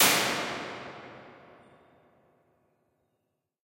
Small Plate 05
Impulse response of an American made stainless steel analog plate reverb. There are 5 impulses of this device in this pack, with incremental damper settings.
IR, Response, Impulse, Plate, Reverb